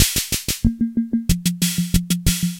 8th bar variation Drum Loop extracted from the Yamaha PS-20 Keyboard. If I'm not mistaken, all drum loops are analog on this machine